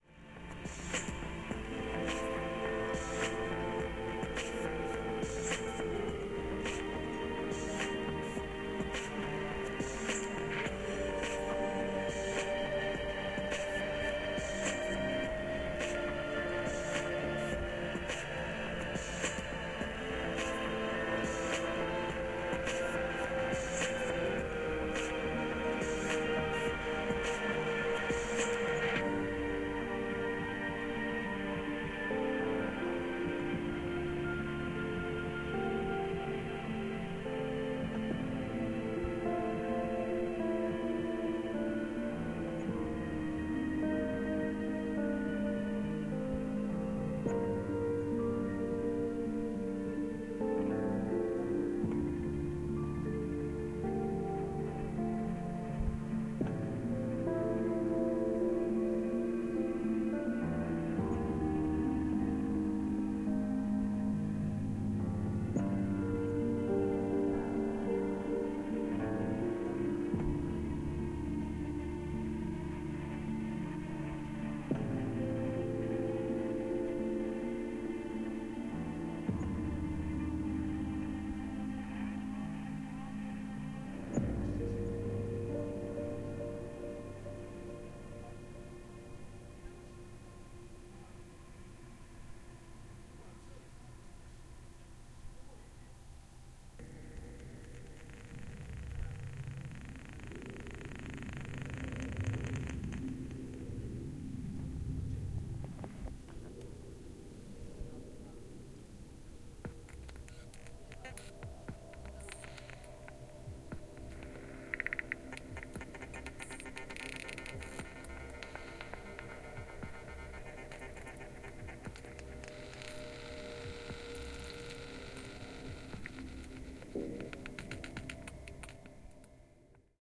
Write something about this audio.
12.08.2011: thirteenth day of ethnographic research about truck drivers culture. Oure in Denmark. In front of fruit-processing plant. Inside the truck cab. listening loudly to the music.